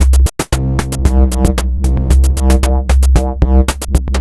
It is a one measure 4/4 drumloop at 114 bpm, created with the Waldorf Attack VSTi within Cubase SX.
The loop has a low tempo electro feel with some expressive bass sounds,
most of them having a pitch of C. The drumloop for loops 00 till 09 is
always the same. The variation is in the bass. Loops 08 and 09 contain
the drums only, where 09 is the most stripped version of the two.
Mastering (EQ, Stereo Enhancer, Multi-Band expand/compress/limit, dither, fades at start and/or end) done within Wavelab.